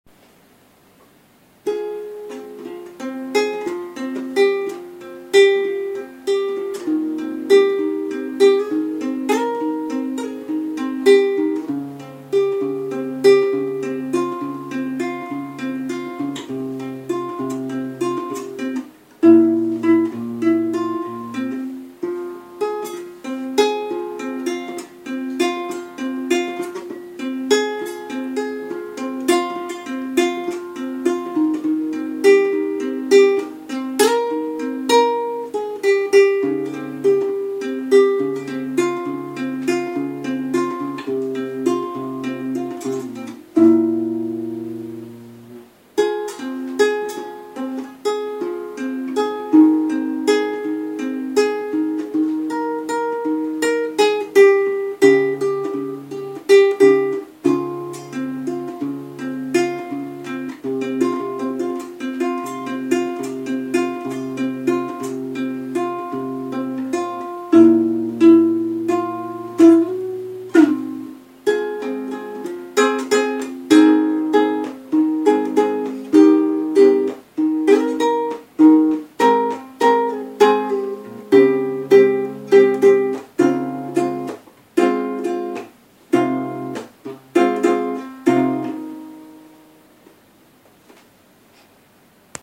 String Fingers
Uneek guitar experiments created by Andrew Thackray
Instrumental, strings